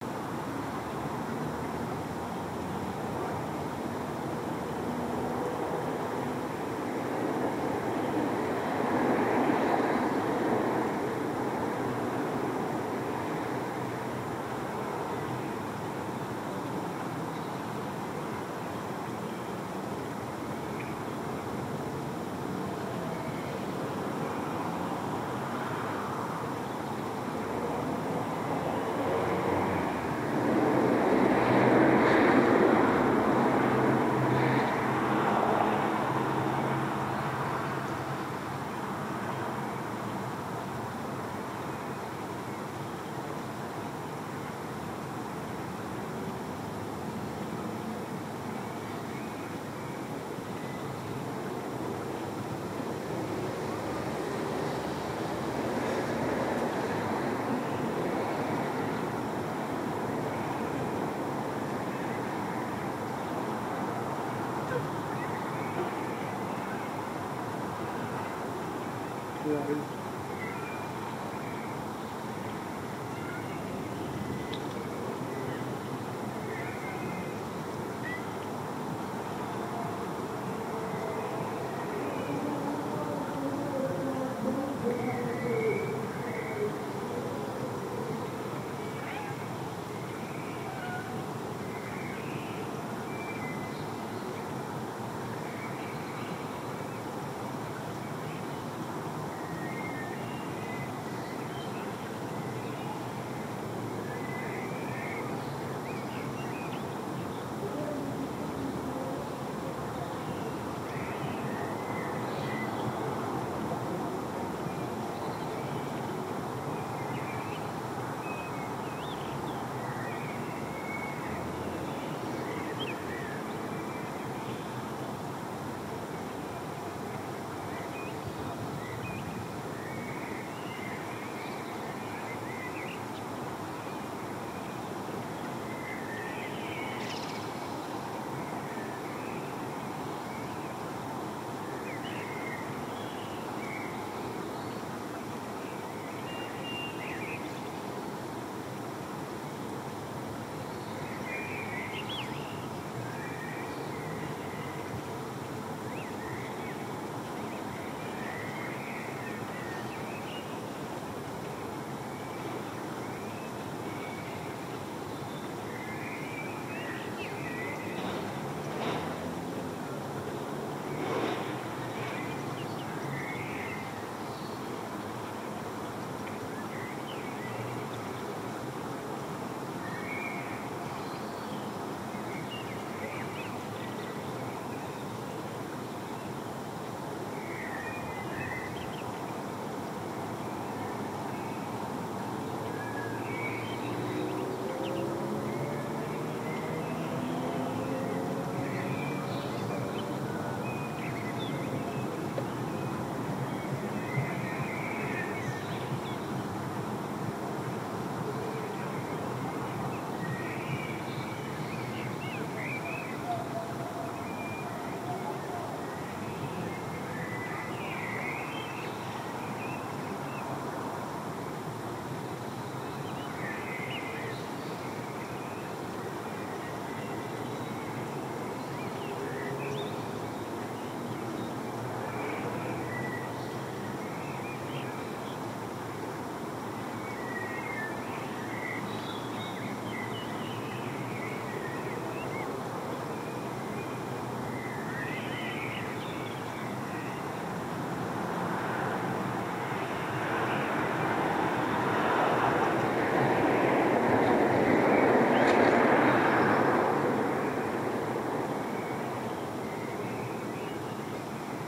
Spring Evening Ambience
Recording of local surroundings on a Zoom H4n